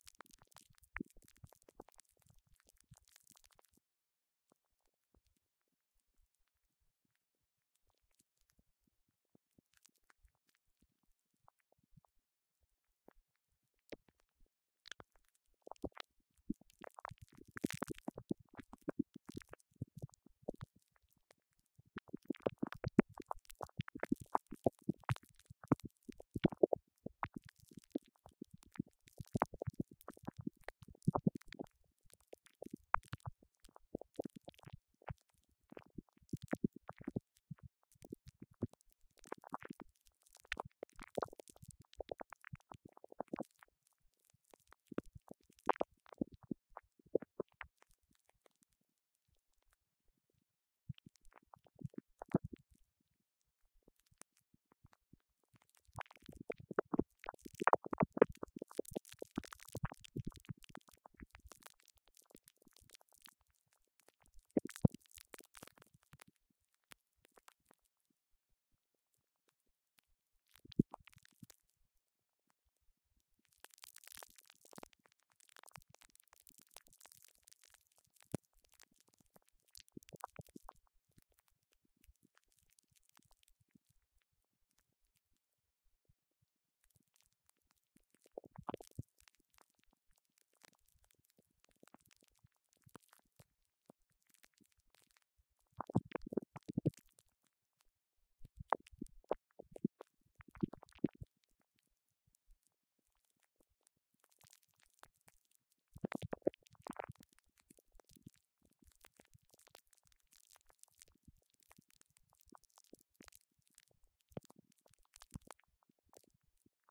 leftovers from reducing noise on a lavalier mic recording
alien, clicks, filter, izotope, leftover, noise, noisereduction, pops, random